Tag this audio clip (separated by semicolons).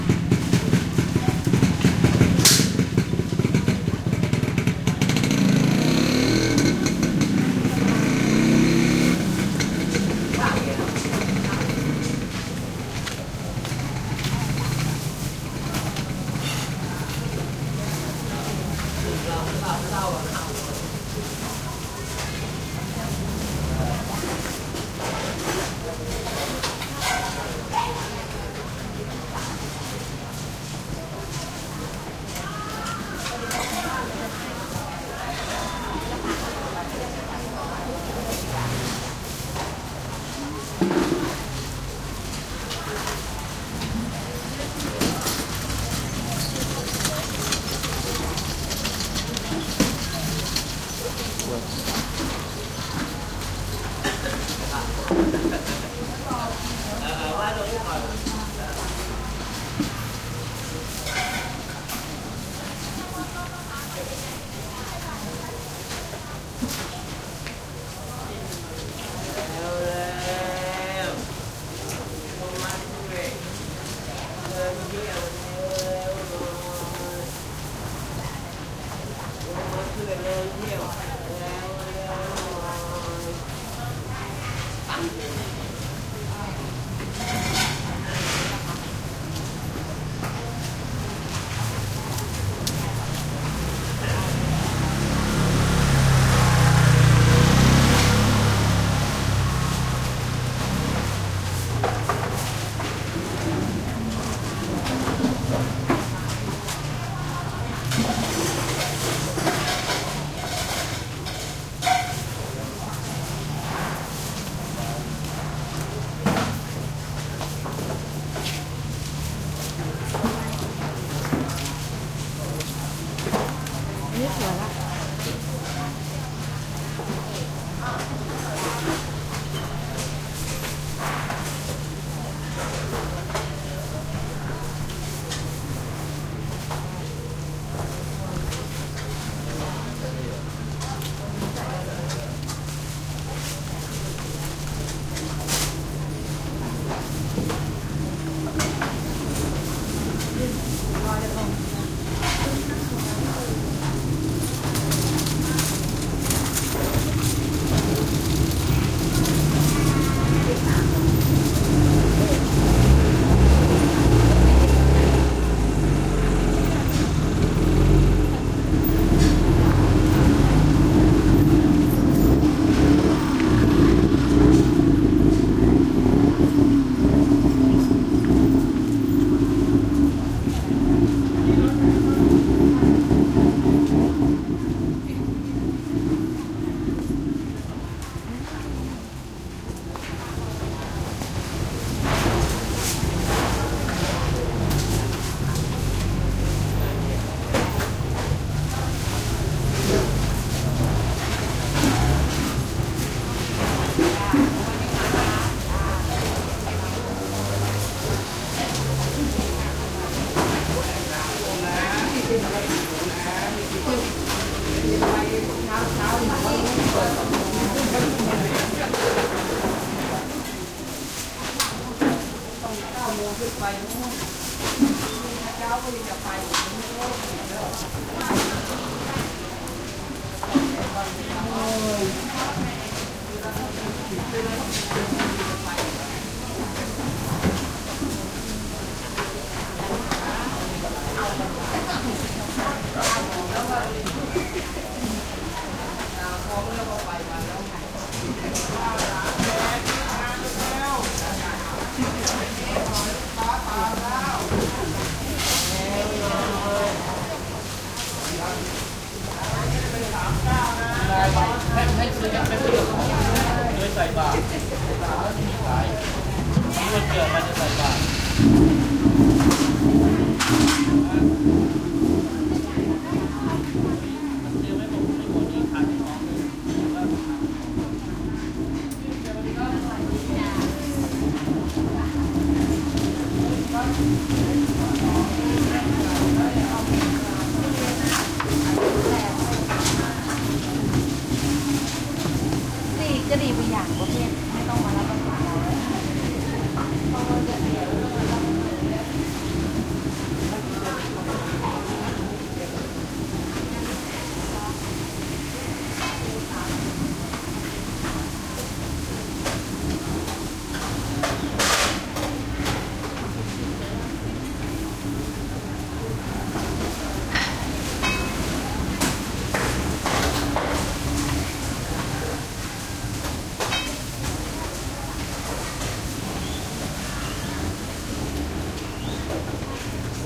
Bangkok; market; motorcycles; field-recording; Thailand; morning; activity